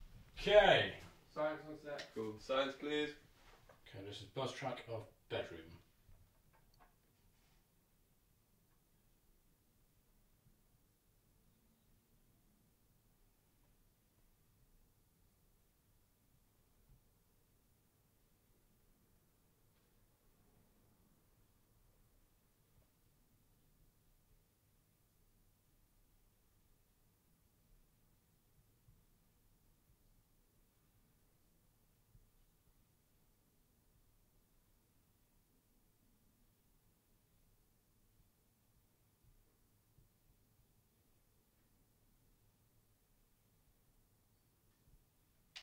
BUZZ TRACK TBEDROOM 1
Ok so most of these tracks in this pack have either been recorded whilst I have been on set so the names are reflective of the time and character location of the film it was originally recorded for.
Recorded with a Sennheiser MKH 416T, SQN 4s Series IVe Mixer and Tascam DR-680 PCM Recorder.
Buzz, Room, Tone